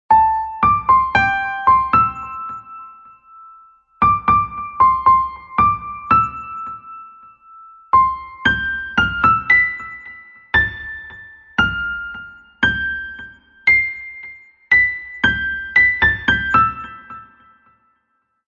Delay Soft Piano
Description: "Life is like a piano; the white keys represent happiness and black shows sadness. But as you go through life's jorney remember that the black keys also make music"
- Unknown
(I don't know who the original owner made this poem, there a lot of author who made this)
Genres: Chill (Most Relatable), Downtempo, Tropical House
Created Using:
- Soundtrap
Instrument Types: Keyboards - Piano
Key - Am
Tempo - 115
atmosphere, Echo, Piano